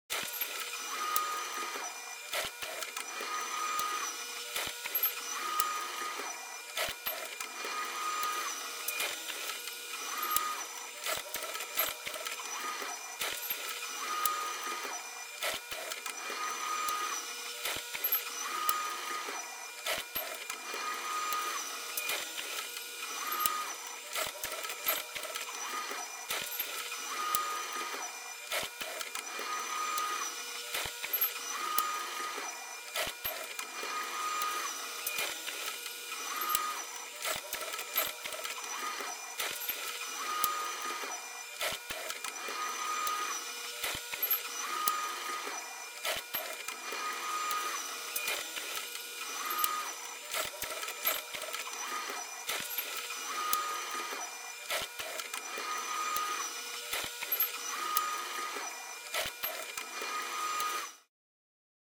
Teletypefax loop
Janky-sounding mechanical device that sounds like an ancient, analog fax machine. Speed it down by half and it is an elevator interior recording.